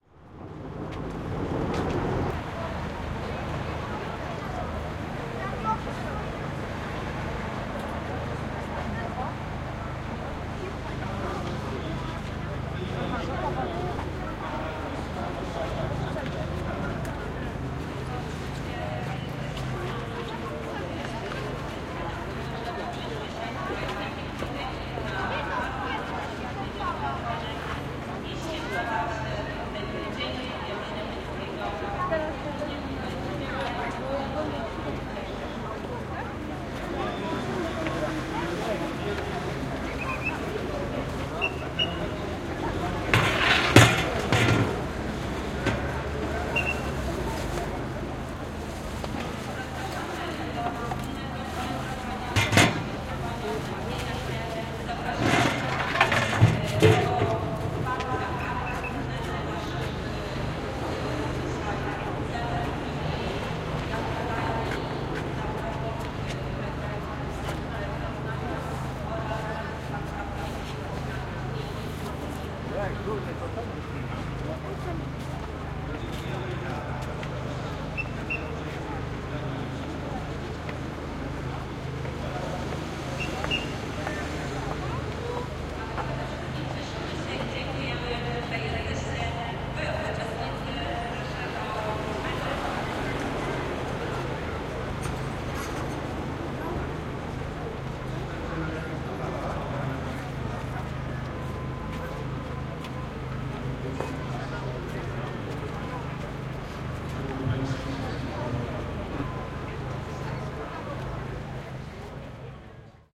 pochód 3 króli poznań 060118 001
06.01.2018: before the Three Kings procession in the center of Poznan (Poland). It's annual tradition. The procession goes from Freedom Square throug Paderewskiego street to Old Town Square. Noise of passing by people, whistle of policeman, noise of traffic. No processing. Recorder Marantz PMD661MKII + shure vp88.
street, field-recording, city, Poland, noise, Poznan, cars, procession, crowd, traffic, whistle